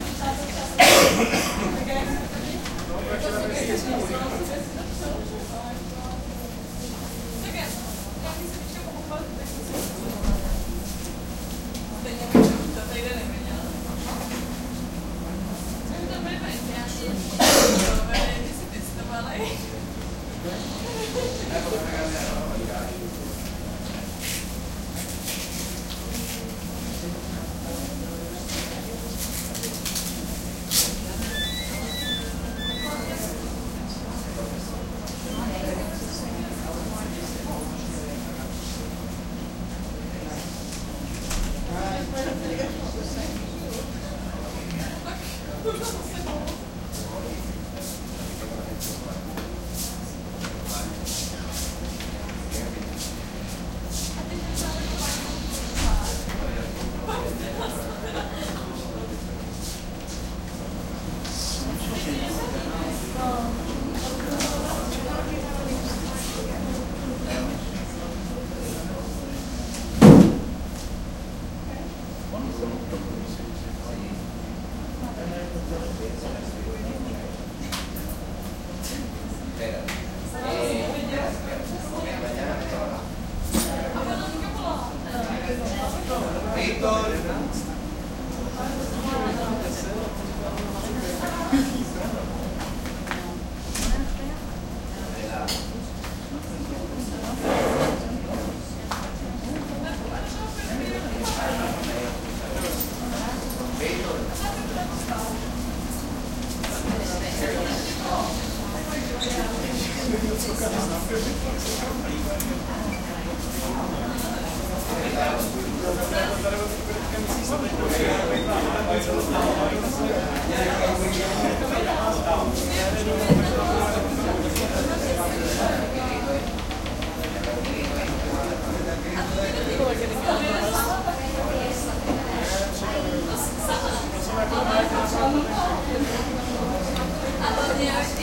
crowd waitingarea bus station
bus station in Varadero, Cuba. spanish and other tourist walla
area, bus, crowd, cuba, station, waiting